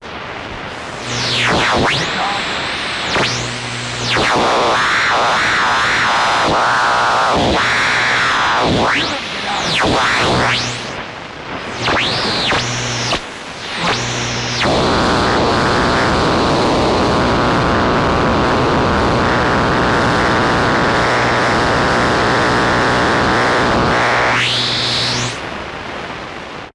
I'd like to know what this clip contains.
Radio Noise 9
Some various interference and things I received with a shortwave radio.
Interference, Noise, Radio, Radio-Static, Static